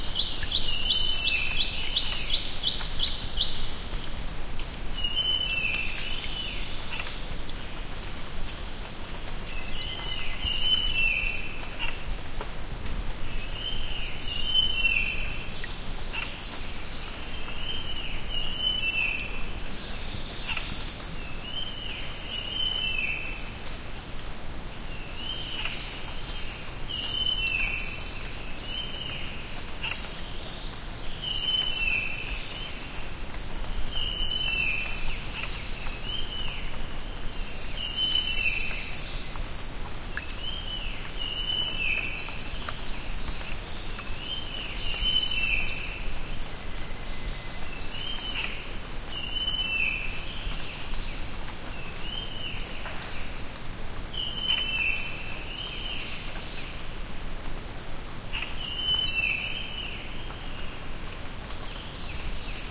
Thailand jungle morning crickets, birds echo +water drops on plants5 full natural
Thailand jungle morning crickets, birds echo +water drops on plants full natural
birds, crickets, drops, field-recording, jungle, morning, Thailand, water